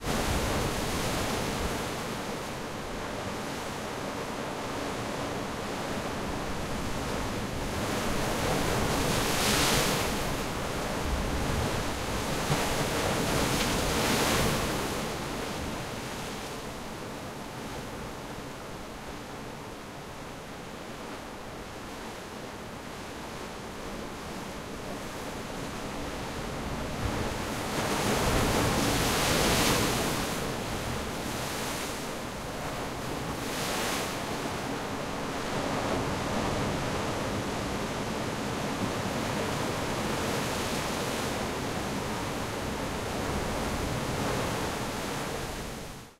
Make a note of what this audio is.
At the beach, waves hitting up against rocks. Some wind noise.